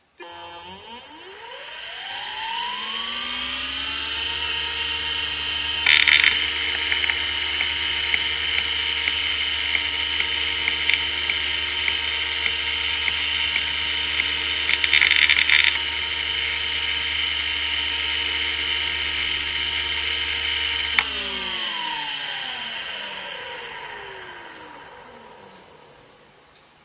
Conner Cougar - 4500rpm - BB
A Conner hard drive manufactured in 1992 close up; spin up, seek test, spin down.
(cp30204)
hdd, disk, rattle